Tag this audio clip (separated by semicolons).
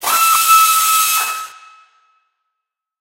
mech; machinery; robot; machine; hydraulic